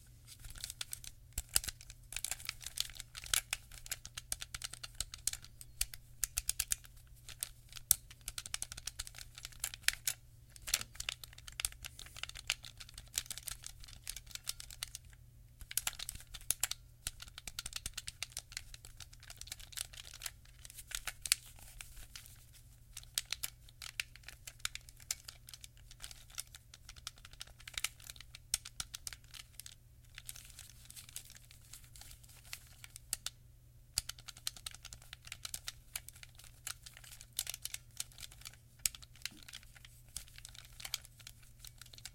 Xbox Controller
Clean foley of someone playing the Xbox.
controller, xbox